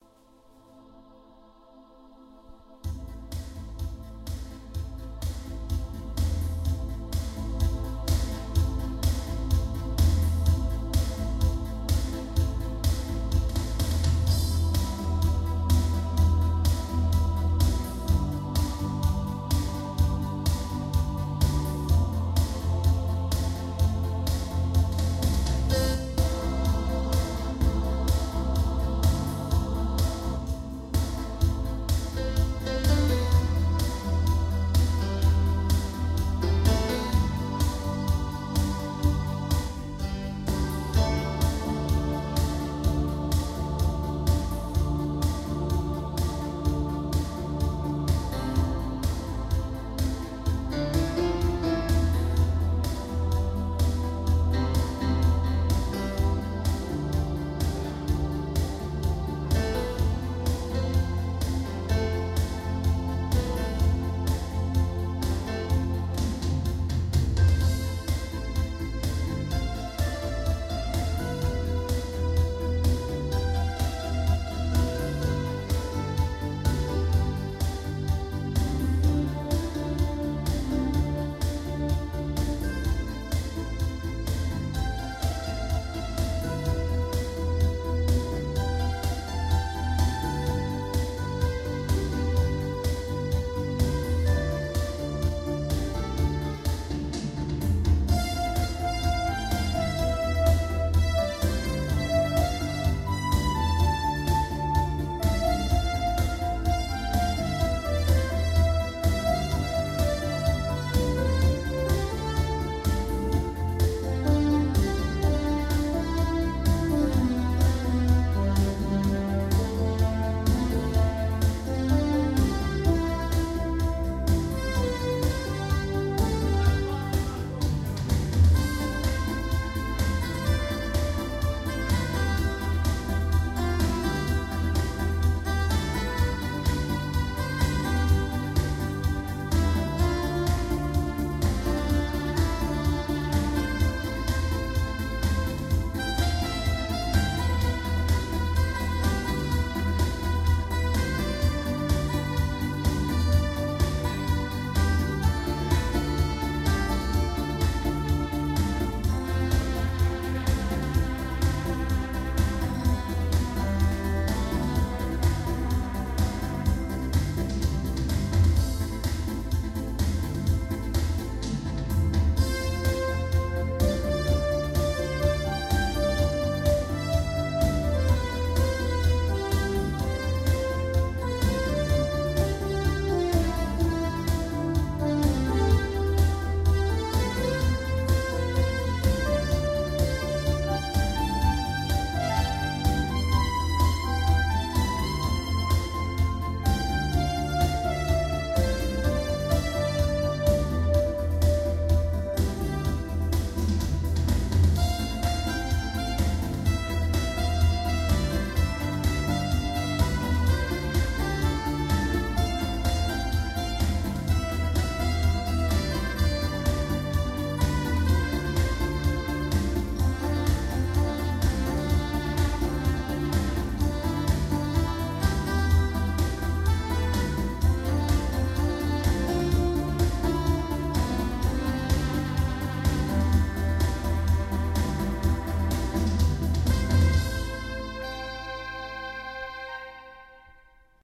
13092014 gronów digital piano
Fieldrecording made during field pilot reseach (Moving modernization
project conducted in the Department of Ethnology and Cultural
Anthropology at Adam Mickiewicz University in Poznan by Agata Stanisz and Waldemar Kuligowski). The pice of music played by an informant. The music player was a grandson who had came to Gronów to play during his granfather birthday. Recordist: Adrianna Siebers. Editor: Agata Stanisz
digital-piano, player, birtday, w, fildrecording, music, village, gron, party